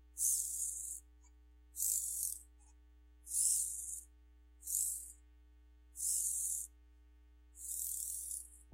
This is a recording of a servo motor sweeping. Make to use it on your projects!
sweep, motor, Servo